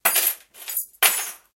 Clear stereo Keys sounds
Thank you for the effort.